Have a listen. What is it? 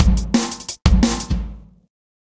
eardigi drums 17
This drum loop is part of a mini pack of acoustic dnb drums
bass, beat, break, jungle, loop, percs, rhythm